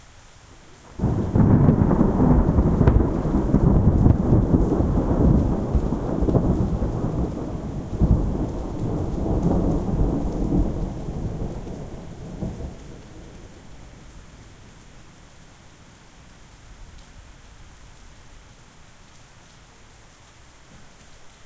This is a pack of the very best recordings of thunder I made through April and May of this year. Many very loud and impressive thunder cracks, sometimes peaking the capabilities of my Tascam DR-03. Lots of good bass rumbles as well, and, as I always mention with such recordings, the actual file is much better quality than the preview, and be sure you have good speakers or headphones when you listen to them.
deep, lightning, crack, boom, splash, cats-and-dogs, pour, thunder, rumble, pitter-patter, bass, loud, rain, water